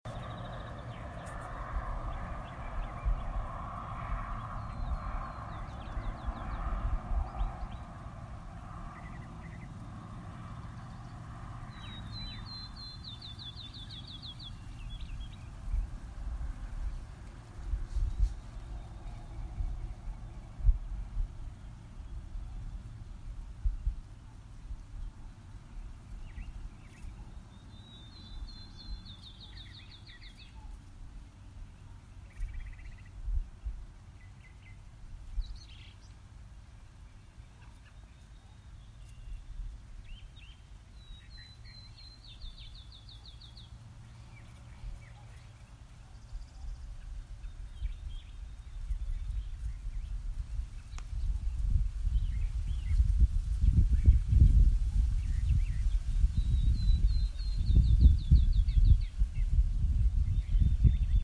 Hillside meadow 20200328
A slight breeze on a hillside in West Virginia with birds chirping. Light traffic in the distance.
birds, field-recording, hillside, nature, Spring, traffic, wind